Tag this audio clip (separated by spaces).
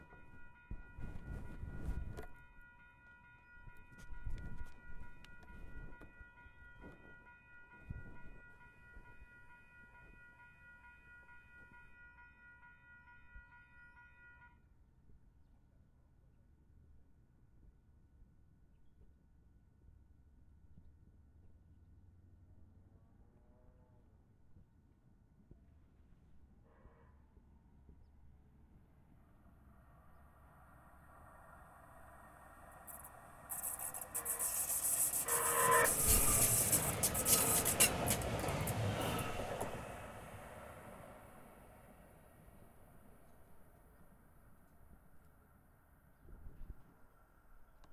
Metro Lightrail Field-Recording